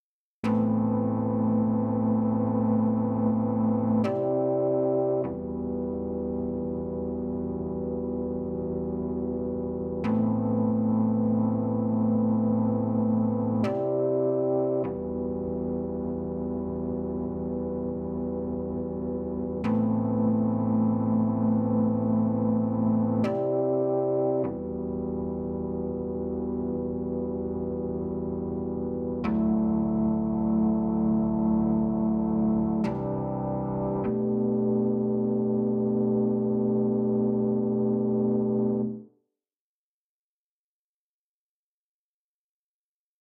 ELECTRO-ORGAN
organ synth line.
line, synth, electro, house